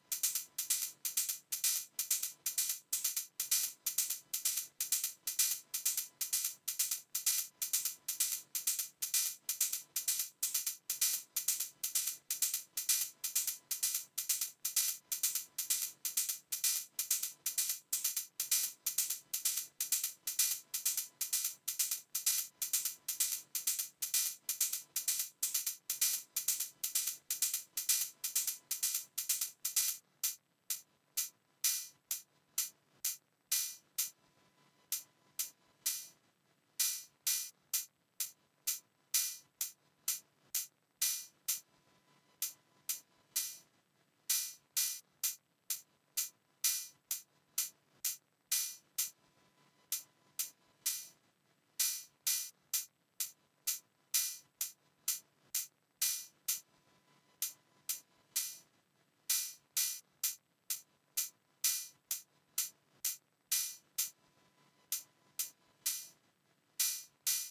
Arturia Acid DB-A Cym
24, arturia, beat, bit, closed, cymbal, dance, drum, drumbrute, drum-loop, drums, hat, loop, open, percussion, percussive, quantized, recorded, rhythm, sample